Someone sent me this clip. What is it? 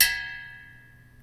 sound is me hitting a half full metal canister with a spoon.
clang, canister